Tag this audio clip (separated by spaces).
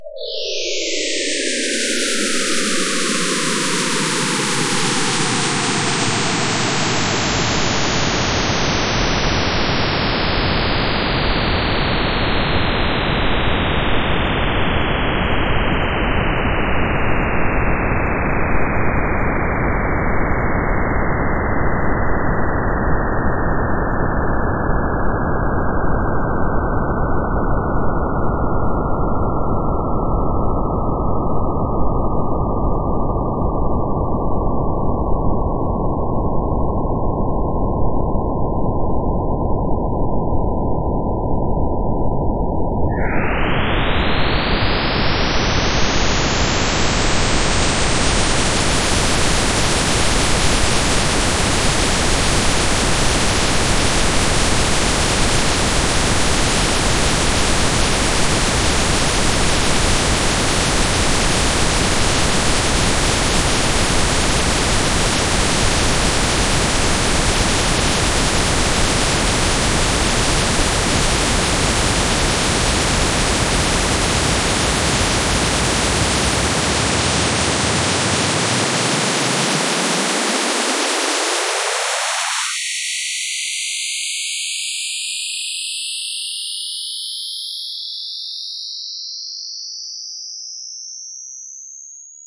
noise space-travel star-wars warp-drivescifi star-trek space-ship